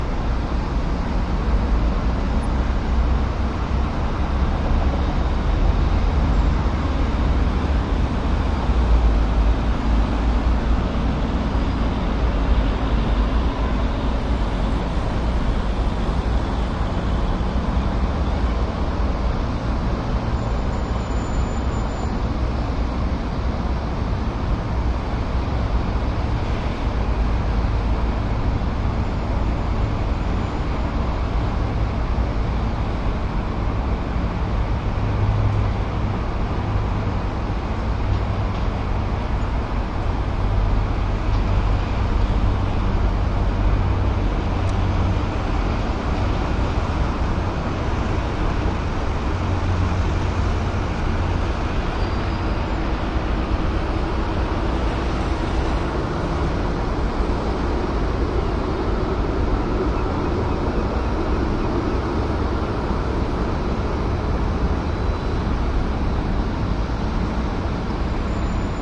Bergen Bird Perspective
Recorded from the roof of the tallest building in Bergen, Norway.
Recorded with a Sony PCM-M10
Traffic, Trains, field-recording, Cars, Street, Town, Road, Bergen, Public, City